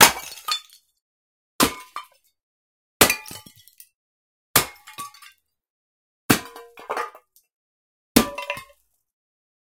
Foley Impact Smash Tiles Stereo
Impact/Smash of Tiles (x6).
Gears: Tascam DR05
smash, destroy, ceramic, ground, tiles, crush, impact, dropping, shatter, destruction, drop, tile, breaking, break, crash